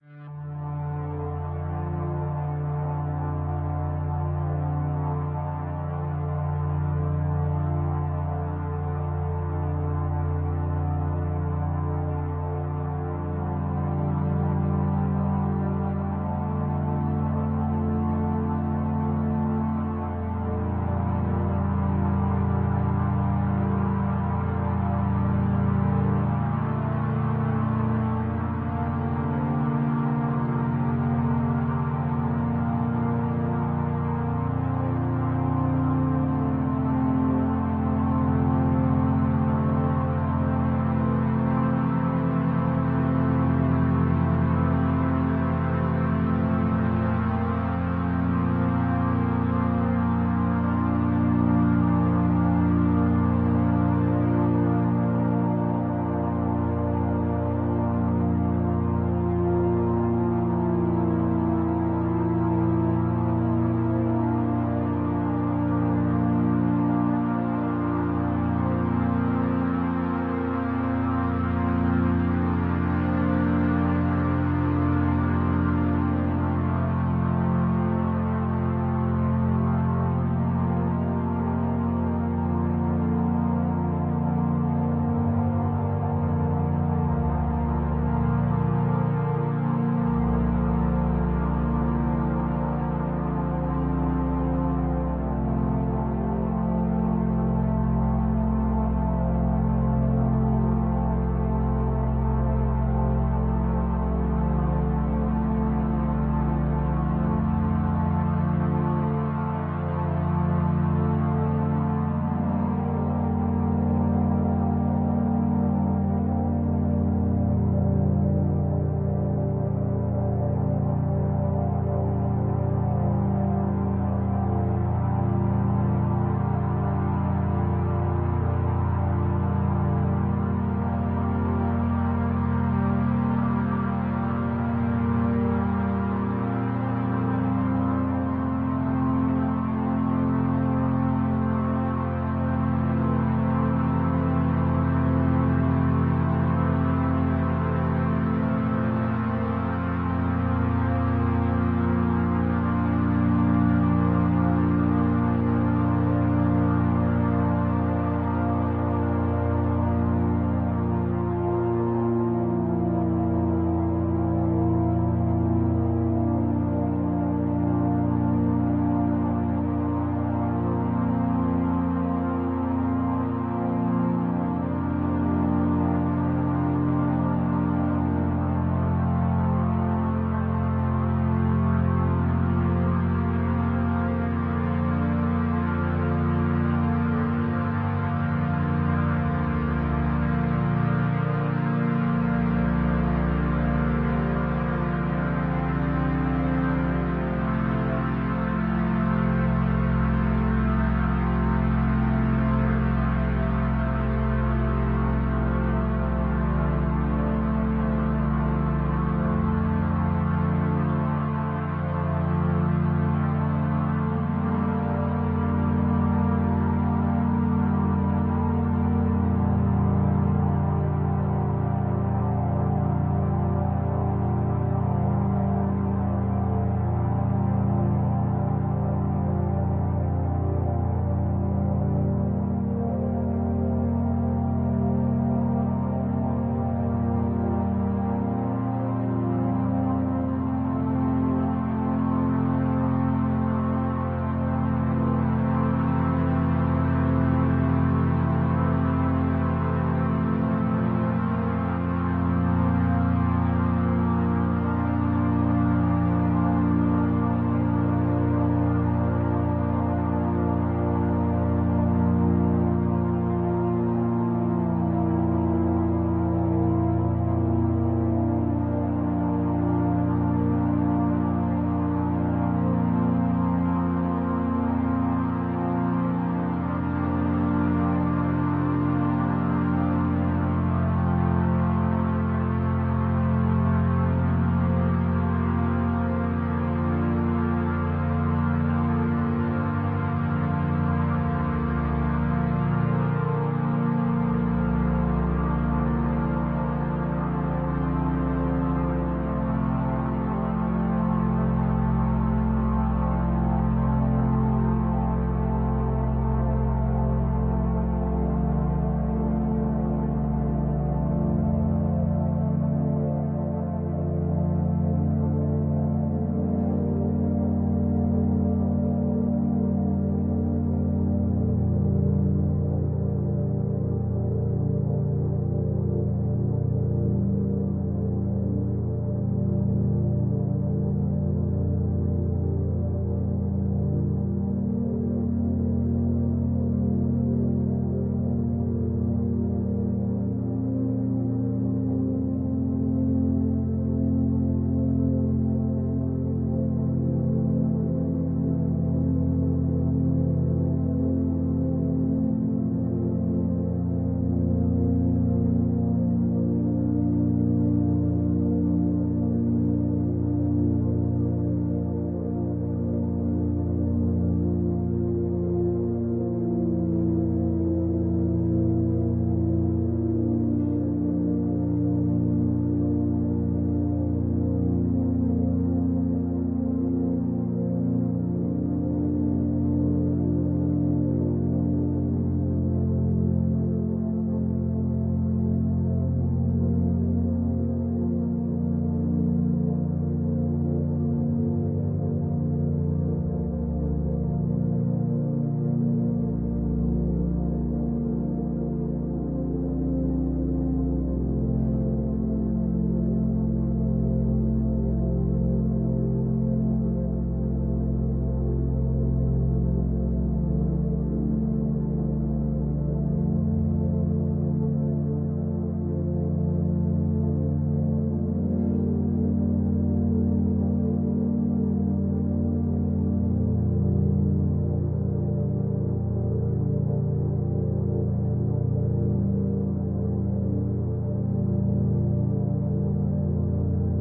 Ambient pad for a musical soundscape for a production of Antigone
smooth, ambient, soundscape, divine, dreamy, pad, drone, musical